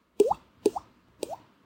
A water drop made by beatbox technique